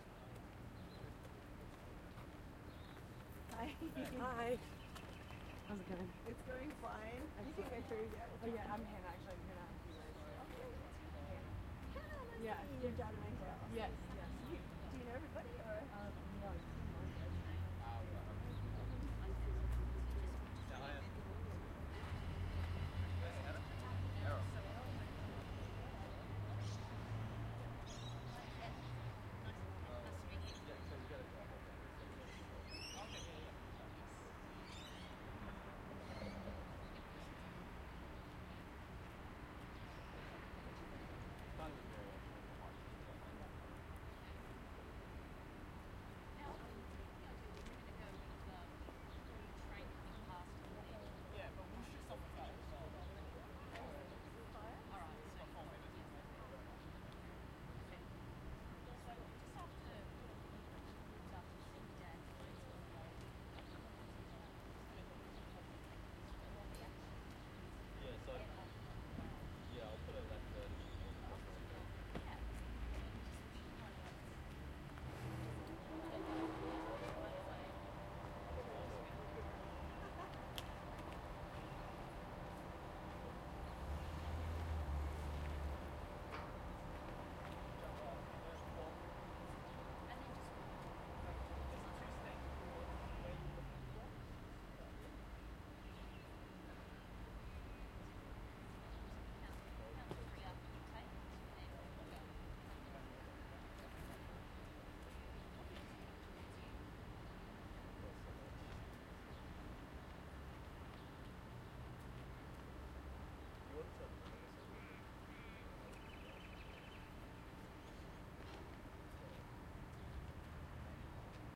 Recorded with Zoom H2N in 4CH Surround
Melbourne, Victoria, Australia
City Atmos - Brunswick
4CH, Atmos, City, Surround